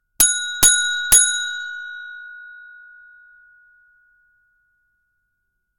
buzzer boardgame three times
The sound of a buzzer from a boardgame, ringing three times.
Recorded with the Fostex FR2LE recorder and the Rode NTG3 microphone.
bell, boardgame, buzzer, fostex, fr2le, gong, ntg3, rode, several, signal, three, times, toy